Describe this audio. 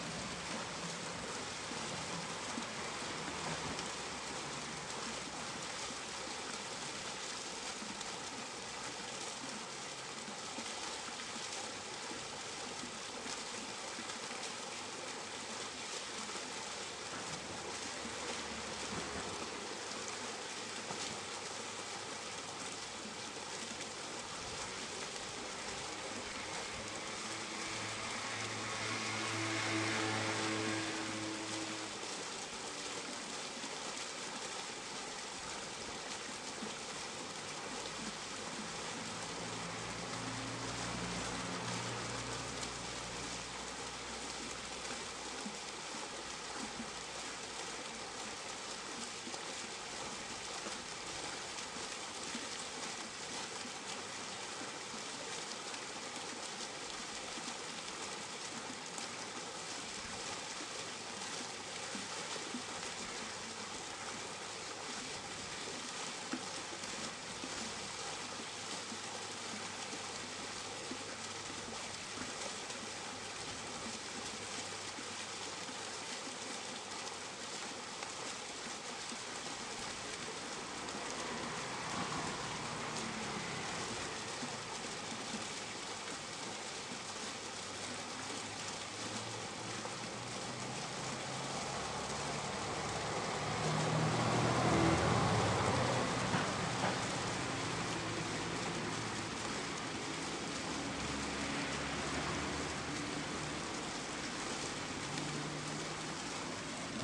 Opatija Fontana LoCut--
little fontain in Opatija to windy